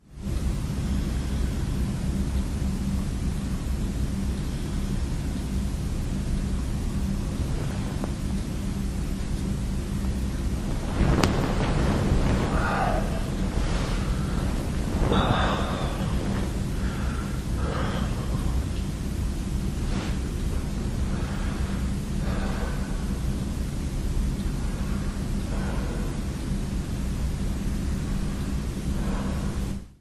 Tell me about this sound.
Moving while I sleep. I didn't switch off my Olympus WS-100 so it was recorded.
bed, body, breath, field-recording, household, human, lofi, nature, noise